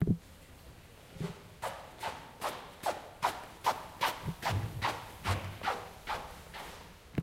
20141118 doormat H2nextXY
Sound Description: Schuhe auf Teppich - shoes on Carpet
Recording Device: Zoom H2next with xy-capsule
Location: Universität zu Köln, Humanwissenschaftliche Fakultät, Gebäude 213, Eingang
Lat: 50°56'1"
Lon: 6°55'14"
Date Recorded: 18.11.2014
Recorded by: Patrick Radtke and edited by Vitalina Reisenhauer
University, Cologne, Field-Recording, Building